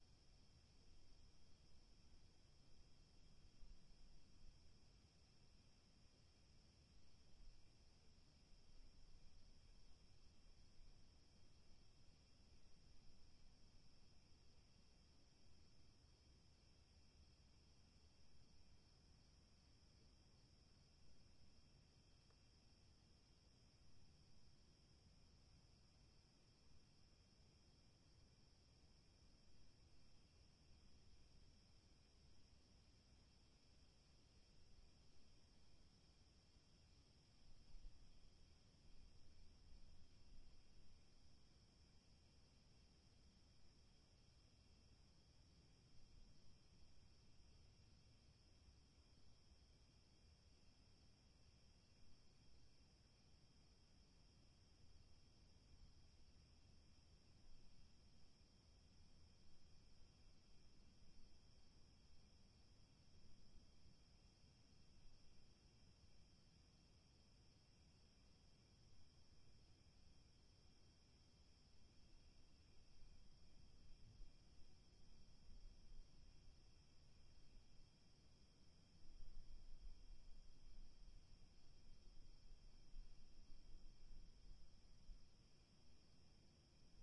LR REAR SUMMER EVE HUDSON CRICKETS
crickets, summer